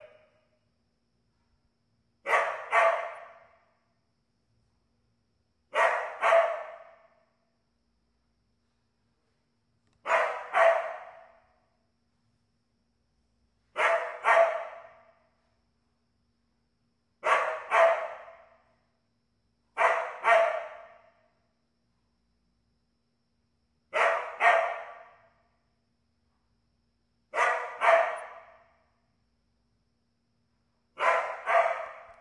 Abstract Soundscape Project